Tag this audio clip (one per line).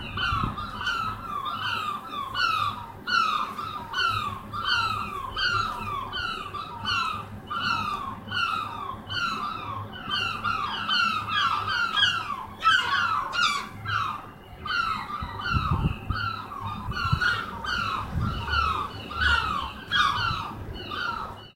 beach
birds
ocean
sea
seaside